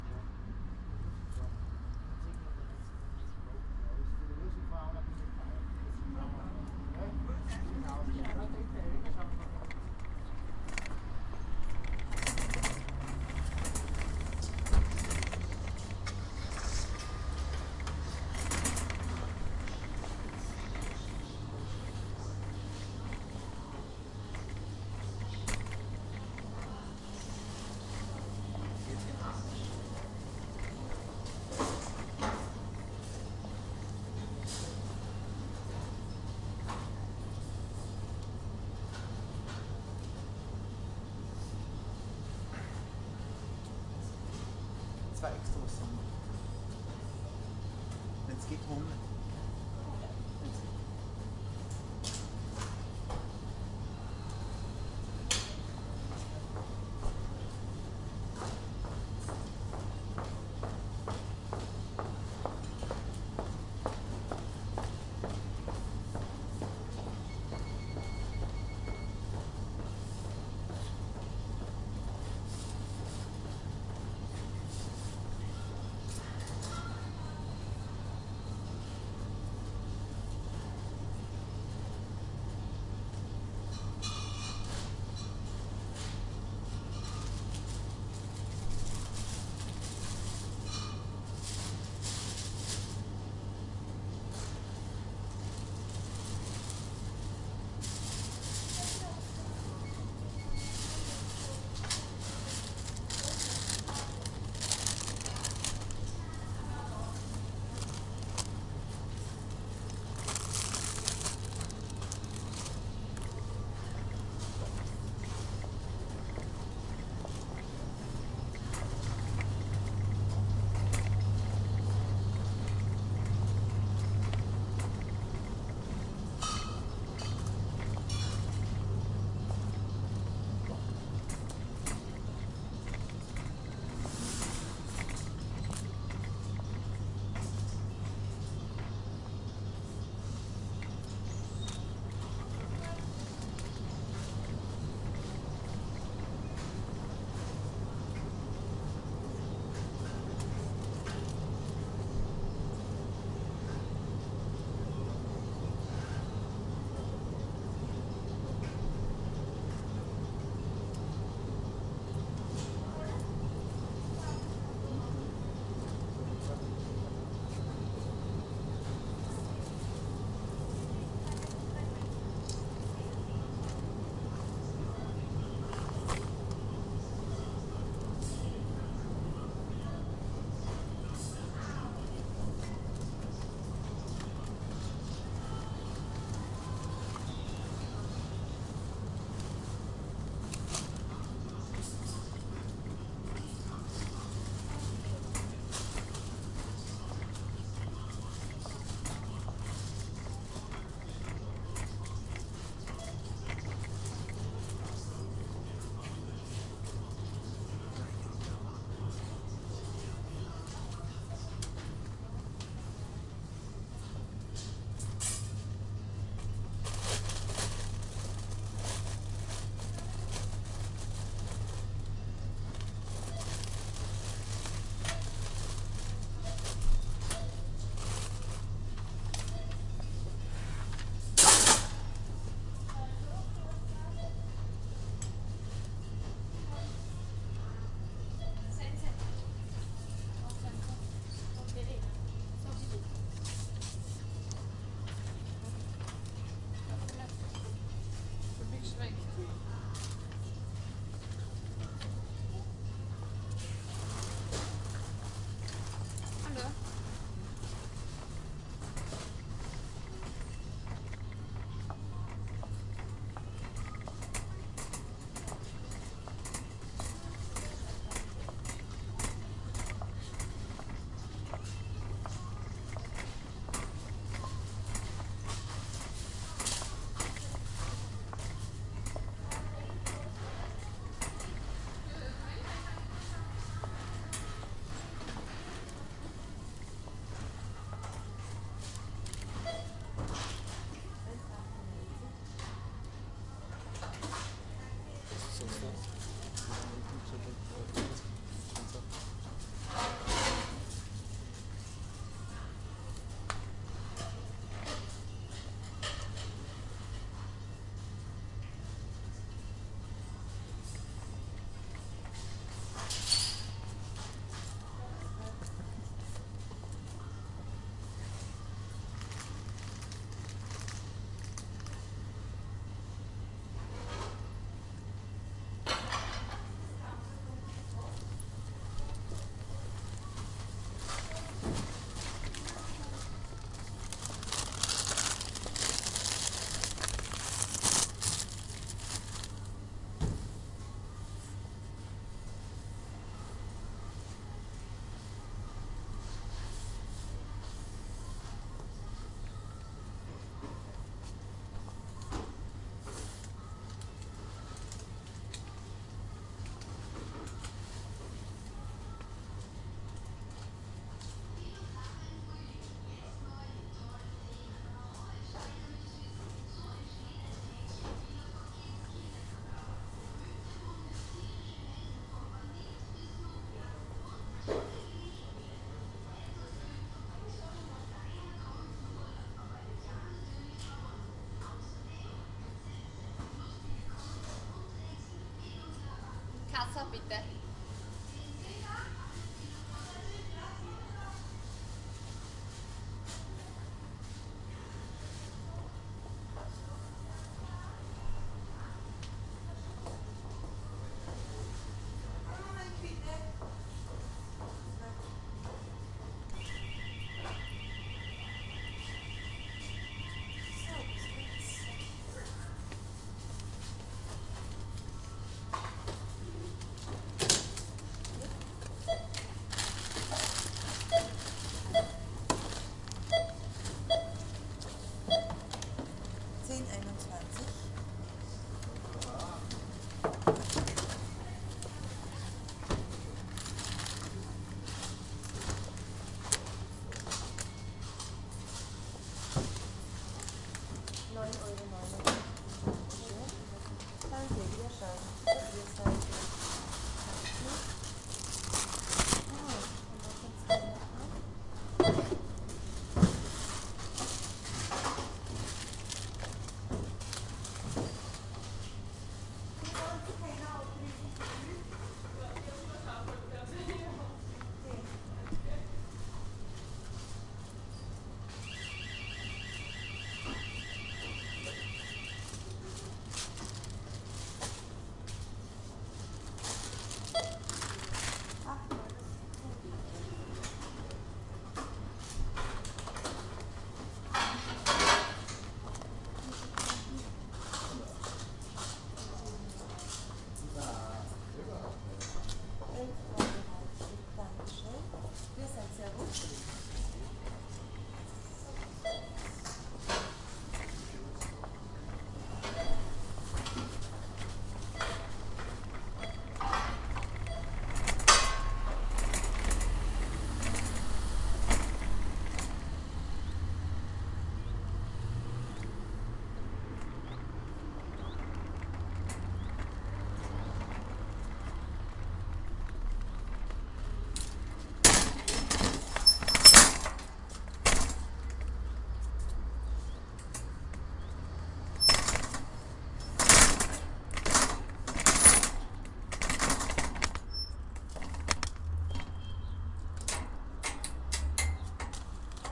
Spur 1 - Aufnahme 6
A supermarket ("Billa") in Krottendorf, Styria, Austria.
Blue Snowball -> Apple Macbook -> Soundtrack Pro.
We bought two "Extrawurstsemmeln", mozzarella, tomatoes and water.
There's some people talking, alarm ringing, cars, and stuff.
billa, alarm, shopping, austria, supermarket